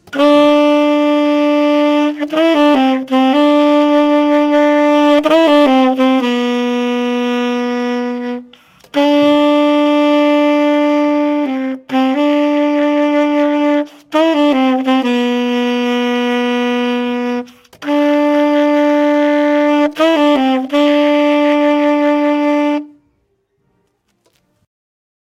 fiddling tenor sax bip
this is another saxophone sample but of my friend using a tenor sax instead
used a sm57 about 3 inches away from the barrel
tenor, sample, saxophone, fiddling